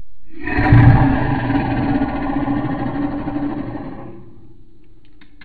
Angry hungry growl
a more angry growl. just me going "raah" into the mic and then slowing it down.